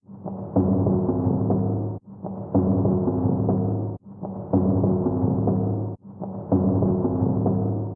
Running up train station steps, metal, echo EQ
Running up metal steps at the train station.
Field-recording, Steps, Sound-design